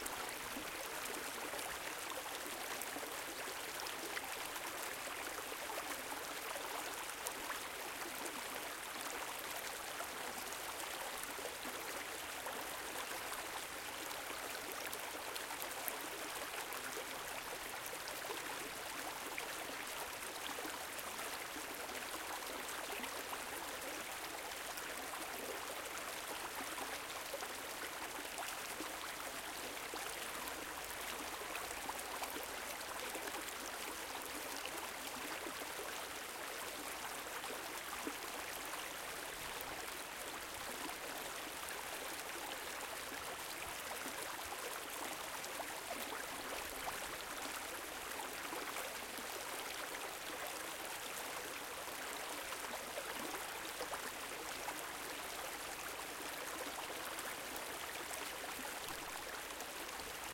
Small river flowing into the Tevere river. Recorded in Città di Castello, Umbria, Italy. Hope you'll like it.
waterfall, flow, small, river, water, stream